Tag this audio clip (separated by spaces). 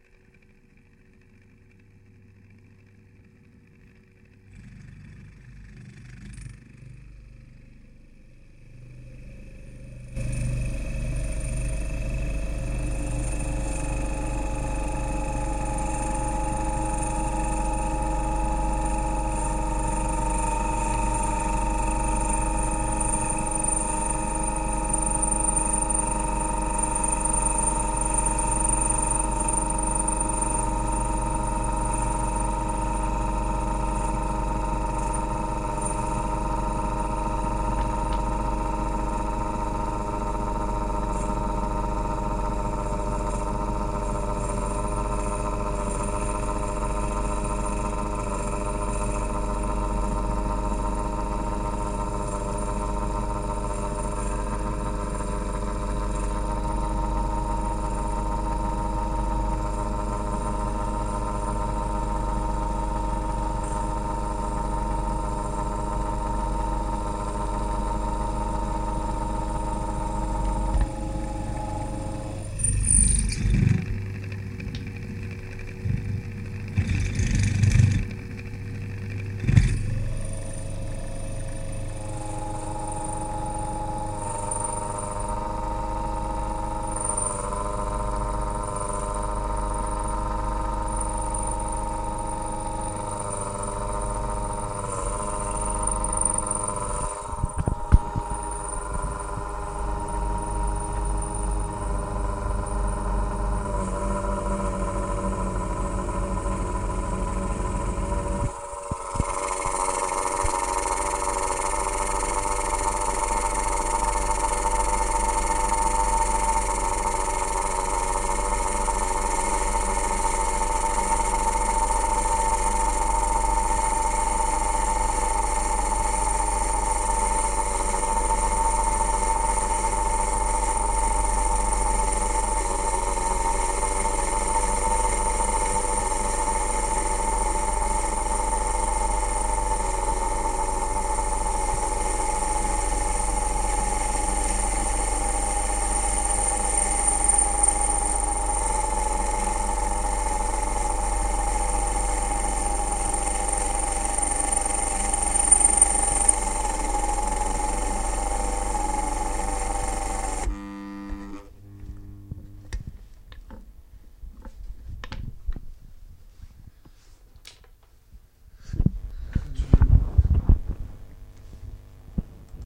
motor ellectric-motor electricity metal swarf drill